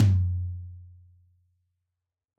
Breathing Tom 3
This is a free one hit sampler of my "Breathing" drum kit samples. Created for one of my video tutorials.
drum, Breathing, samples, tom